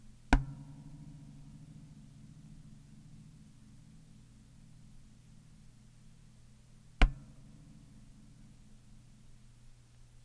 hitting the neck of the bass guitar with my hand
bass, guitar, MTC500-M002-s14